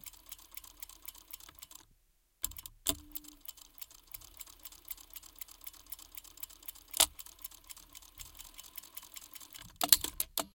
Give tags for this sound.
machinery; POWER; machine; industrial; coudre

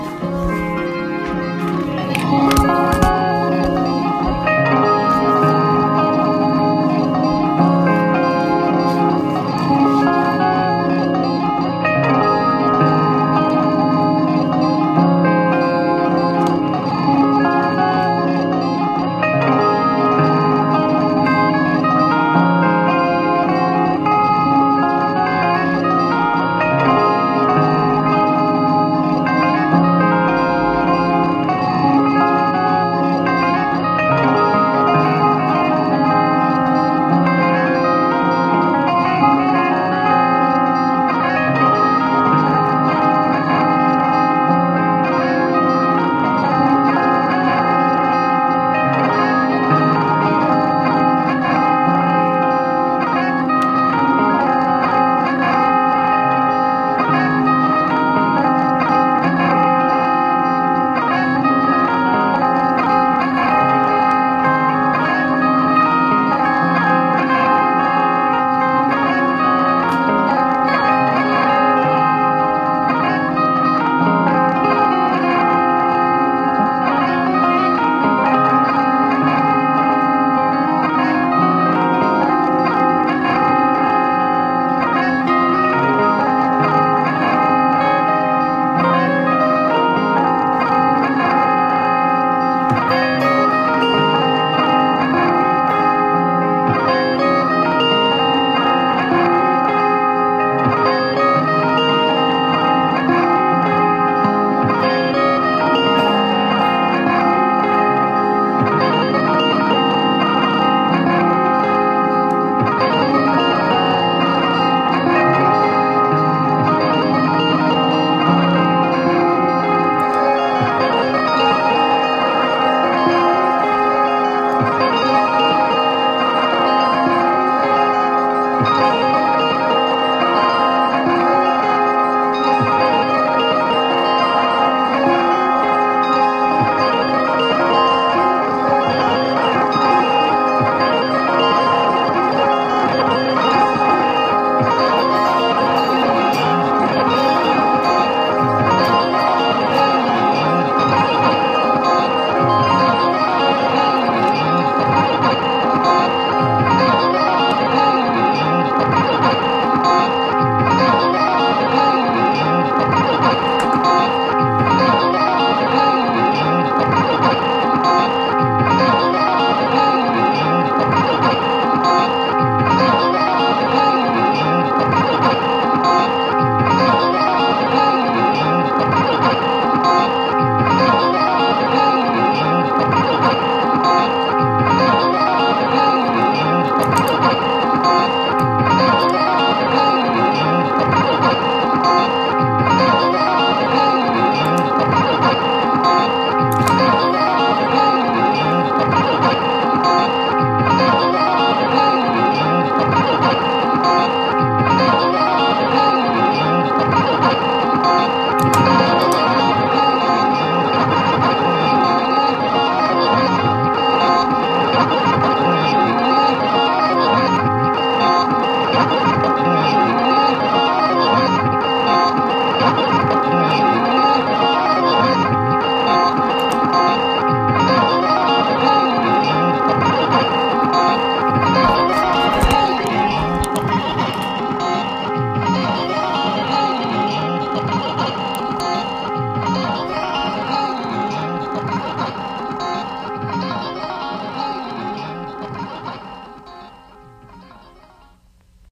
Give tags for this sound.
guitar; loop; pedals

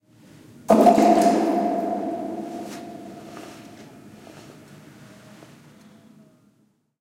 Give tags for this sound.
reecho; reverberation; reverb; cave; field-recording; underground; water

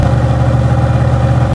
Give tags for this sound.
Engine; Truck; Car; Motor

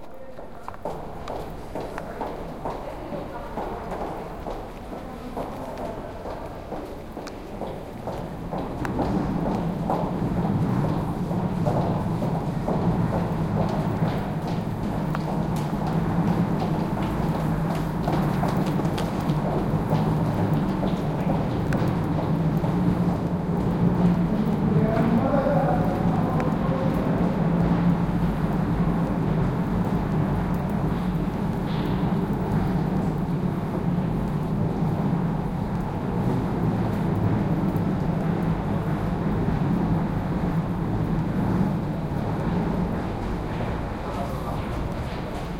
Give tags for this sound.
ambiance; tunnel

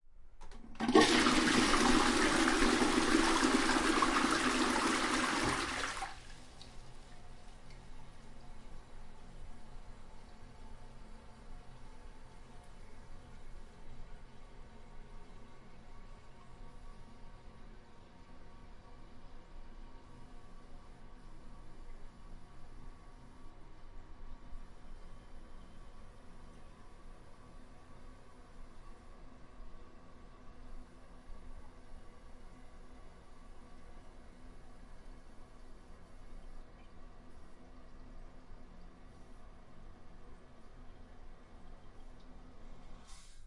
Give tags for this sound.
flushing; flush; toilets; plumbing; bathroom; water; Toilet; washroom

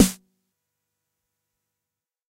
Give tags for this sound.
909; drum; jomox; snare; xbase09

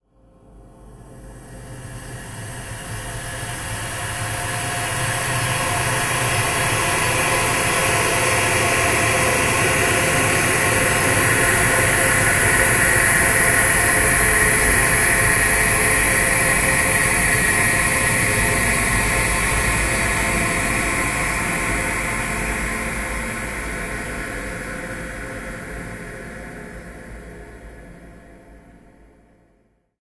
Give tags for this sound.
divine; multisample; pad; soundscape; space

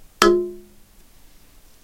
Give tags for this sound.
hit; metal; thud; Bonk; against; crash

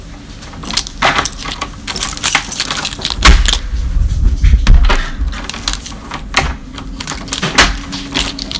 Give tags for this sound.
Open; Key; Door; horror; Ghost

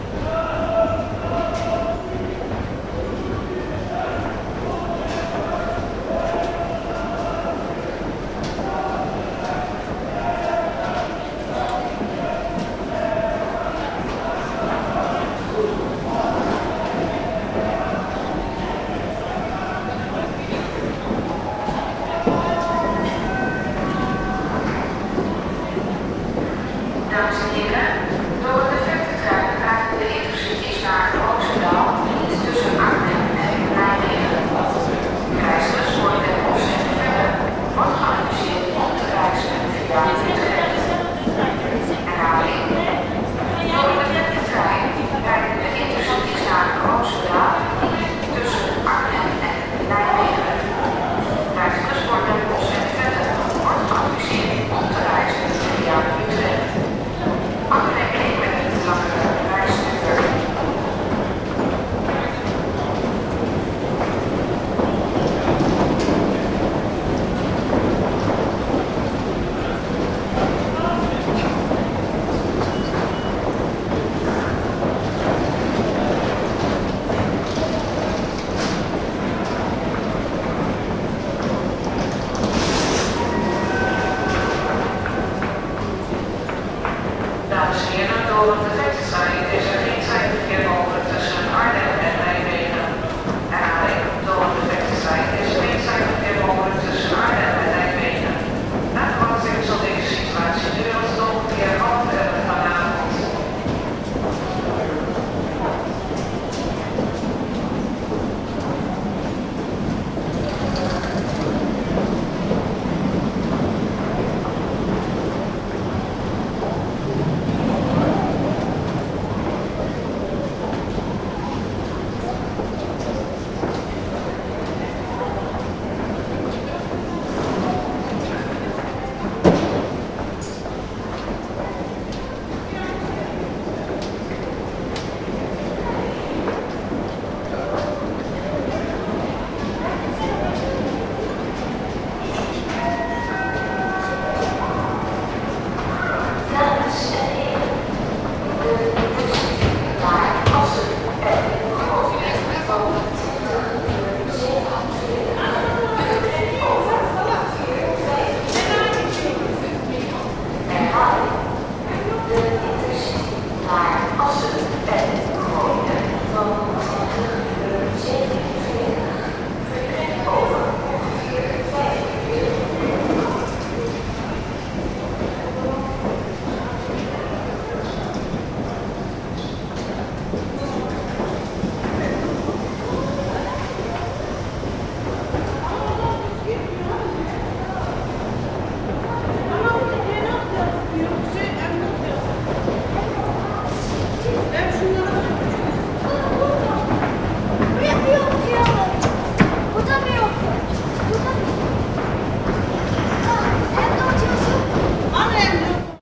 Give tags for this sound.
Dutch; Netherlands; Passenger-terminal; Railway; Station; Train; Zwolle; announcement; hooligans

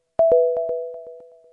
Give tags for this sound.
pick-up; life; object; game; power-up; energy

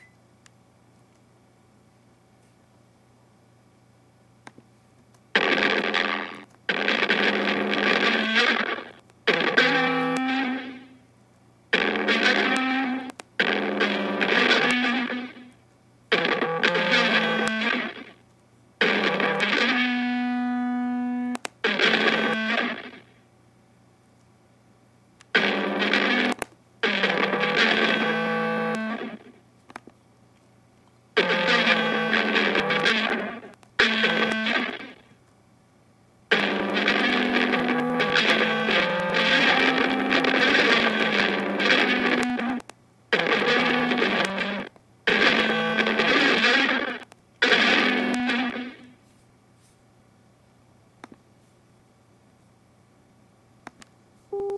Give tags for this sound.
mac; house; mp3aunt; recordpad; annettes; garageband

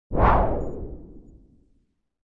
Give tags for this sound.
effect; fly; future; fx; noise; scifi; sfx; soundeffect; space; swash; swish; swoosh; swosh; transition; wave; whoosh; wind; wish; woosh

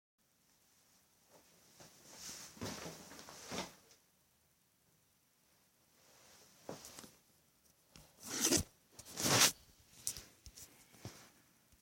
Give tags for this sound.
Chair; cartoon; sit-down; soundesign

